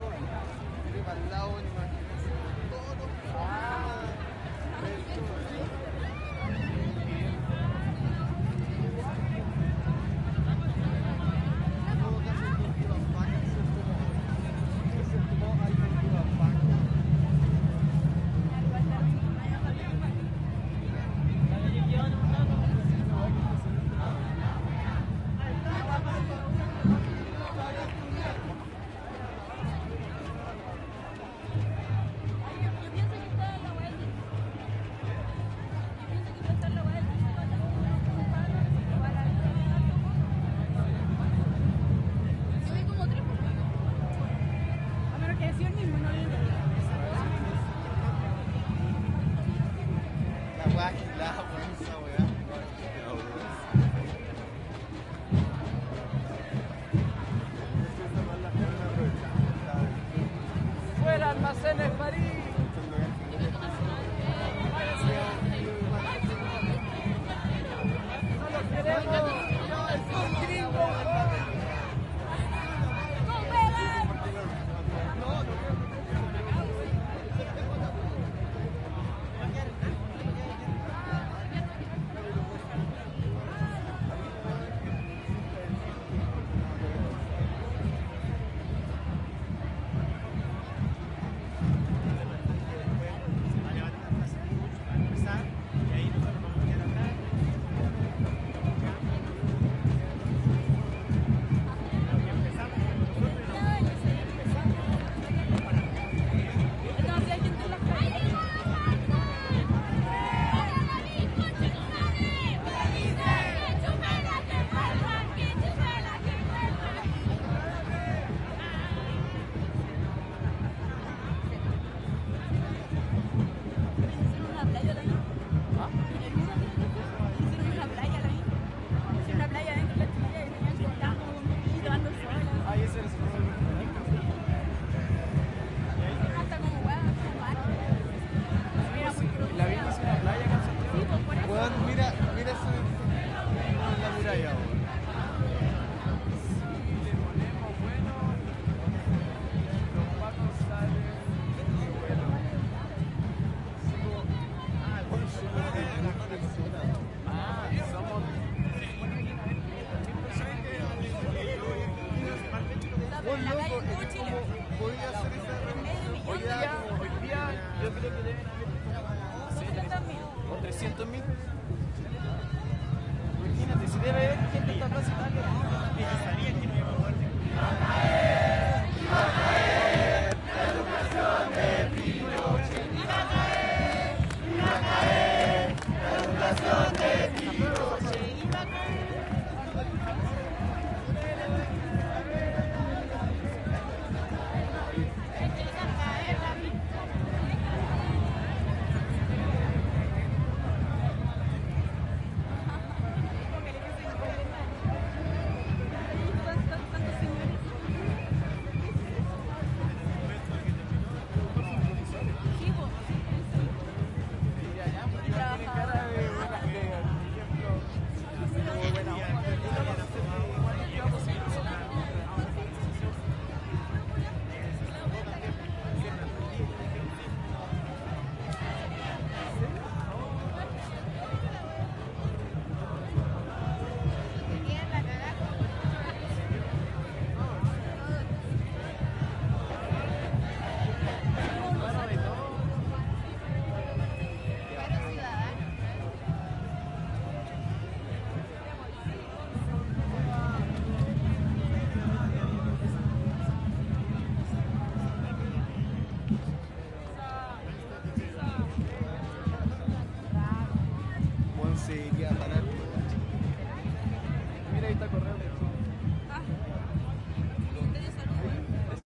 marcha estudiantes 30 junio 02 - conversaciones varias
Desde baquedano hasta la moneda, marcha todo tipo de gente entre batucadas, conversaciones, gritos y cantos, en contra del gobierno y a favor de hermandades varias.
Diversos grupos presentan algún tipo de expresión en la calle, como bailes y coreografías musicales en las que se intercruzan muchos participantes.
Redobles de tambores llegando a Correos de Chile, fuera almacenes parís, la que cuelga entre conversaciones varias y va a caer el que no salta.
drums, sniff, carabineros, chile, de, tambores, march, batucadas, protest, crowd, marcha, santiago, protesta, murmullo, cops, conversaciones, estudiantes